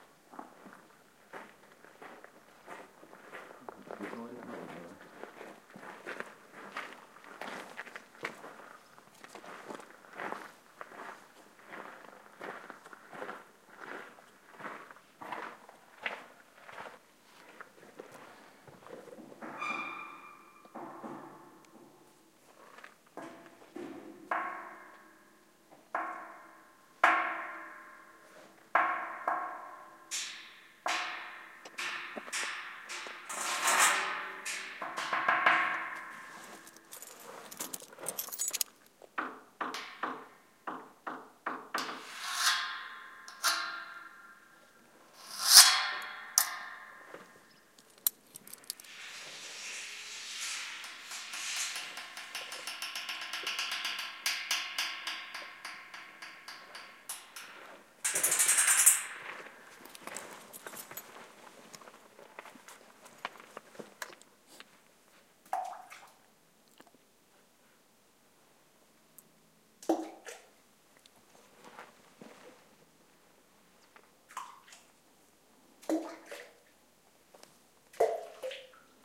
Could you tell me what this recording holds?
someone walks on gravel, knocks on a metal structure, then pebbles fall to still water. Shure WL 183, Fel preamp, PCM M10 recorder. Recorded at the roman theatre of Casas de Reina, near Llerena, Badajoz (S Spain) with Shure WL 183, Fel preamp, PCM M10 recorder. The place has excellent acoustics, as good as you would expect in a 2000-yr old Roman theatre